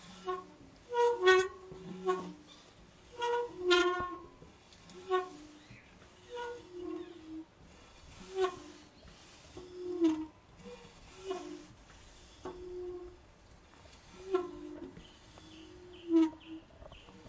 Gate squeak 3 long
metal, spooky, squeaking, hinges, door, long, metallic, hinge, scary, squeak, open, foley, creak, horror, squeaky, close, gate, halloween, creaking